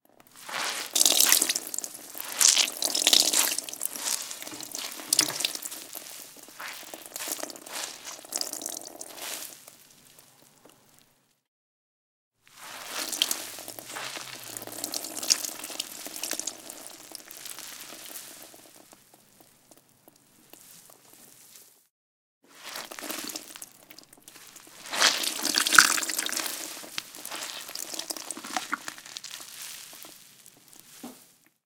mop squeeze water drops into plastic bucket
recorded with Sony PCM-D50, Tascam DAP1 DAT with AT835 stereo mic, or Zoom H2
drops, into, bucket, mop, water, squeeze, plastic